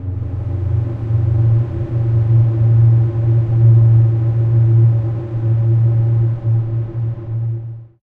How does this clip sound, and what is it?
SteamPipe 3 GhostBlow E1
This sample is part of the "SteamPipe Multisample 3 GhostBlow" sample
pack. It is a multisample to import into your favourite samples. A pad
sound resembling the Ghost blow preset in the General Midi instruments
from several manufacturers. In the sample pack there are 16 samples
evenly spread across 5 octaves (C1 till C6). The note in the sample
name (C, E or G#) does not indicate the pitch of the sound but the key
on my keyboard. The sound was created with the SteamPipe V3 ensemble
from the user library of Reaktor. After that normalising and fades were applied within Cubase SX & Wavelab.
ambient, atmosphere, blow, industrial, multisample, pad, reaktor